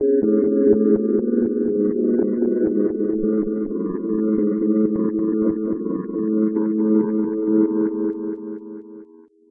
Patch #34 - Higher pitched version of Organ A3. >> Part of a set of New Age synths, all made with AnologX Virtual Piano.